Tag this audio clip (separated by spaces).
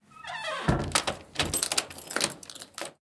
handle foley slam door key squeaky opening wooden lock gate squeak closing close doors open creak